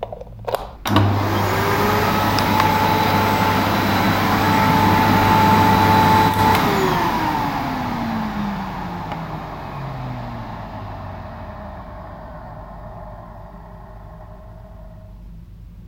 Vacuum Cleaner 2
Vacuum cleaner in action. Various sounds.
Recorded with Edirol R-1 & Sennheiser e185S.